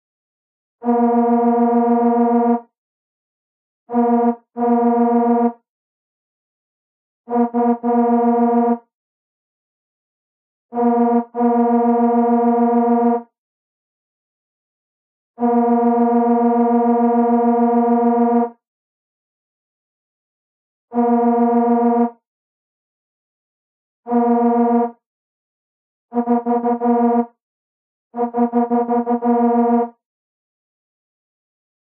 This is the sound of a bus/truck horn blast. It is designed with various expressions for different situations. Perfect for traffic scenes!